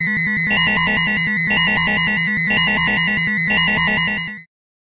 Emergency 1 (Short)
Beeping complimented by two pulses - it gives this a feeling of urgency. Not a typical alarm, by any means, but sure to energize.